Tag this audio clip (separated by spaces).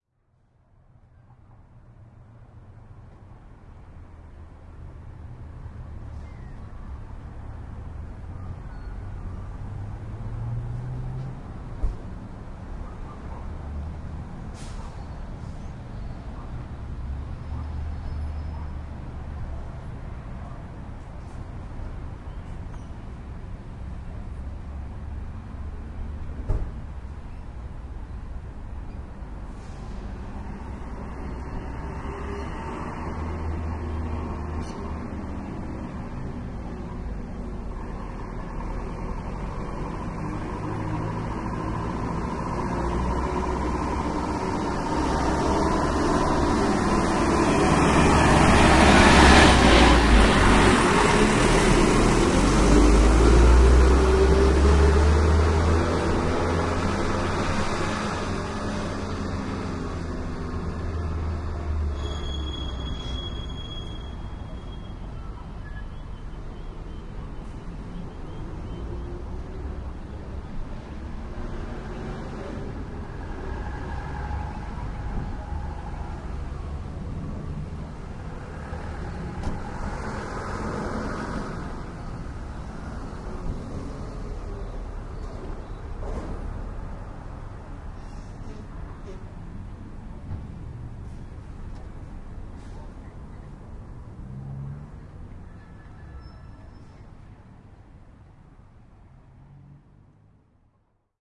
diesel-van car-park fx field-recording